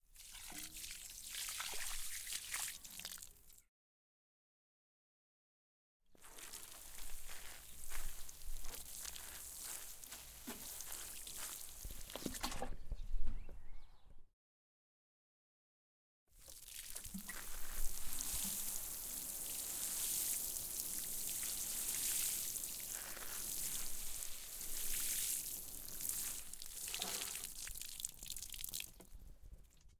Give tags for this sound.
can garden panska watering-can

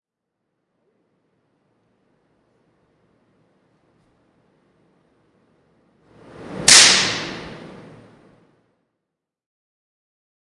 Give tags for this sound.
electrical sparks spark

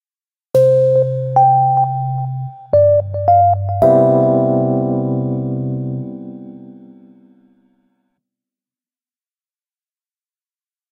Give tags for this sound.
africa african ring marimba open present ringtone tone apertura sonido phone cierre tono ring-tone presentacion

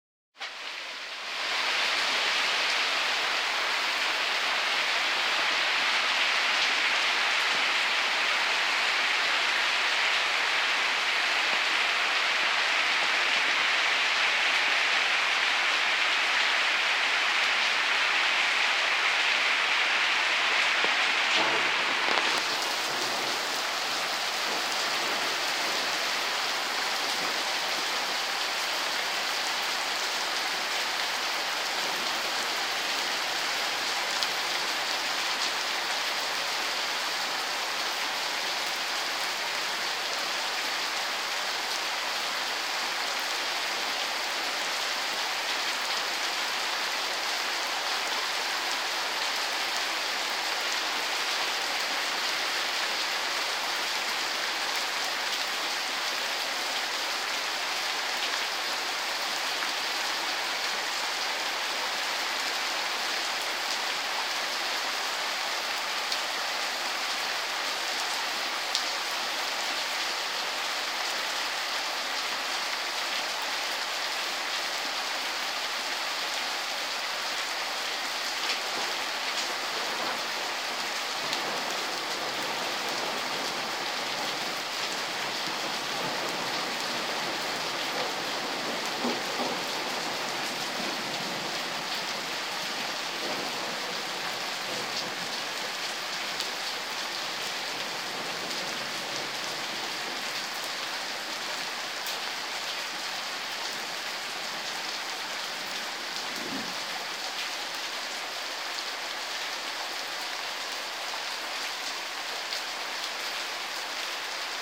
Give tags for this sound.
field-recording thunder rain ground lightning leaves

